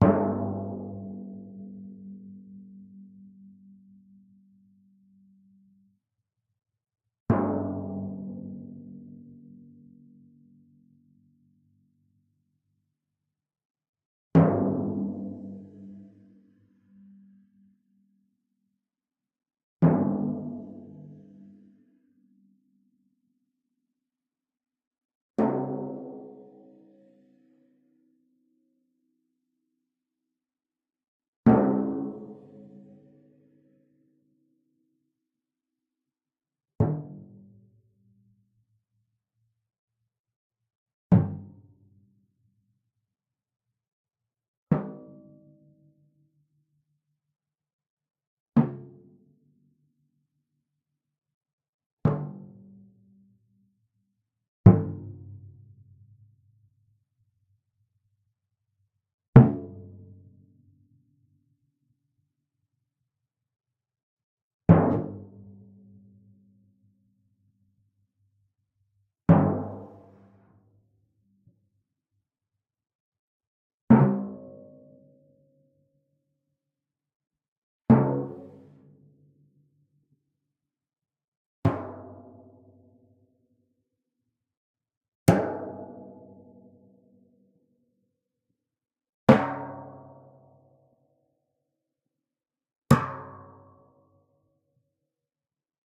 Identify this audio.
timp modhits
some 'modified' timpani hits. various techniques such as damping the head with one hand, striking and then pressing or sliding with the mallet, playing with fingers, etc. 'performed' (i am not a timpanist) on both drums, tuned to G (low) and C (high). mono, mic positioned about 80 cm above and between drums.
drum, drums, flickr, hit, percussion, timpani